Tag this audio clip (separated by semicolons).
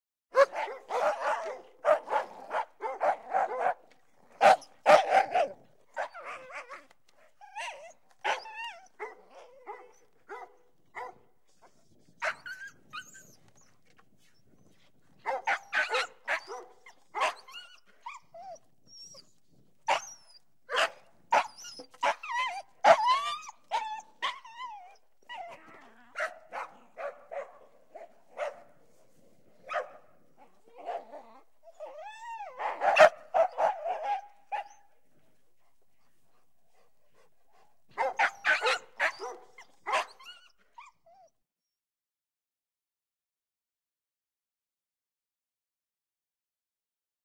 barking; dogs; whine